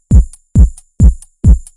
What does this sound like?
part of kicks set